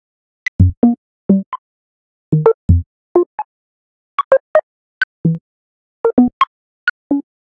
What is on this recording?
tom loop w/random pitch modulation
synthetic, percussion